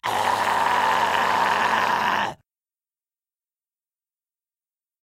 Alex-HighGrowl1
High Growl recorded by Alex
growl, high, voice